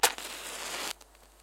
Match strike 03
A match strike recorded with Oktava MK-319
fire, strike